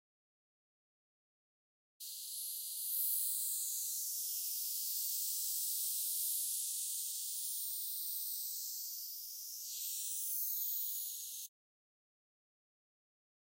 a picture of myself made with noise, you can see it looking at the spectrogram. In Audacity, for example, select the spectrum view instead of the more commonly used waveform view. To get a decent resolution the spectrogram should have a relatively narrow FFT band (1024 or above), results are best in grayscale. The original stereo audio file was produced with GNU/GPL Enscribe 0.0.4 by Jason Downer, then converted to converted to a single channel with Audacity